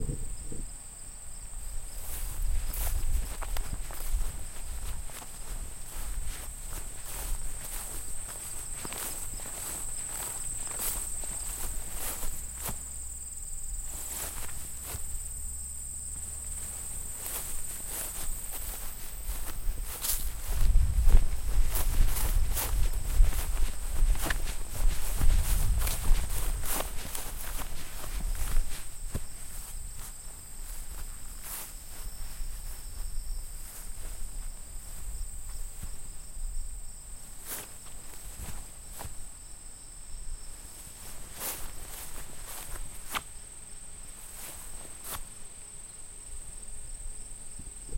FOOTSTEPS OF VARIOUS PACES THROUGH HIGH GRASS
Footsteps of various paces through tall grass on a windy summer day.